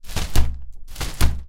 The great sound that a good umbrella makes.